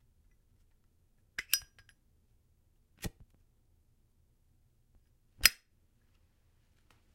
a zippo lighter opening and lighting.
flame, lighter, zippo